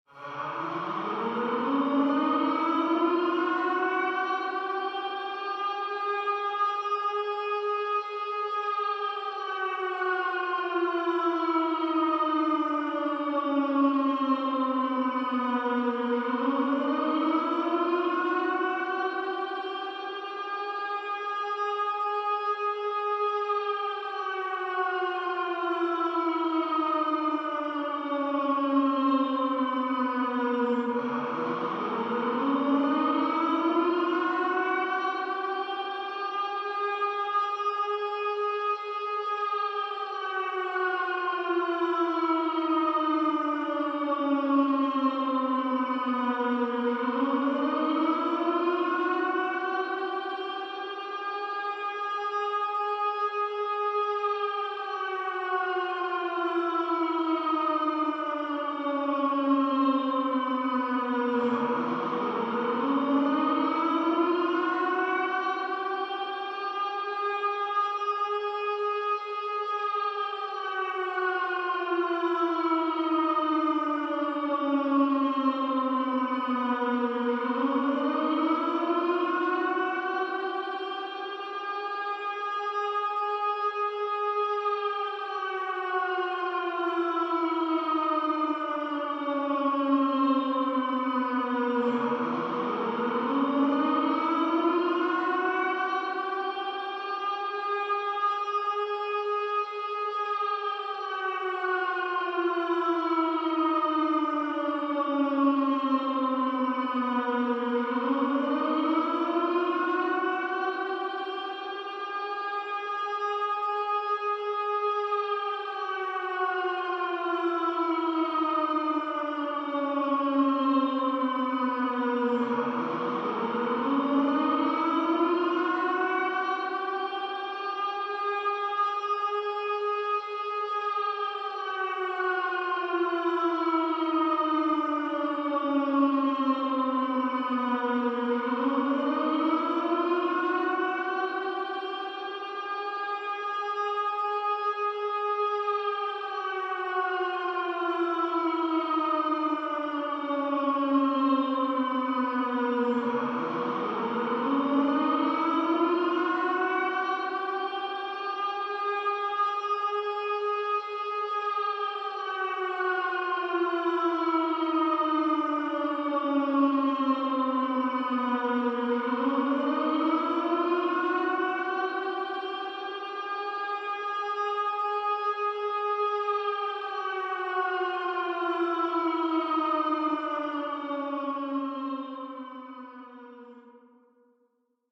Scary Siren (Air Raid, Tornado, Nuke)
[WARNING] |This audio contains sound that may make people feel uneasy and anxious. Please listen with caution.|
This is a 3 minute long sound of HORROR! You may use this in horror movies, for War movies, a remake of Twister, even anything you want!
air-raid, warning